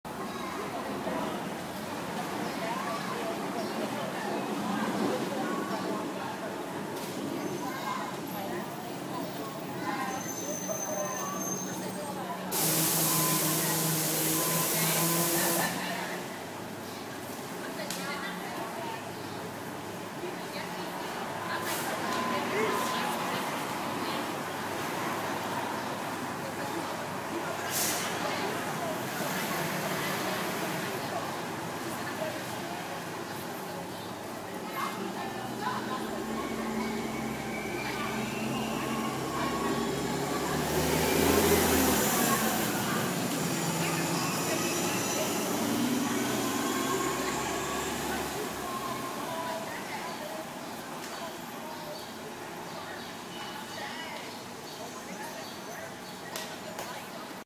The sounds of a residential street in Brooklyn, NY after a rain shower.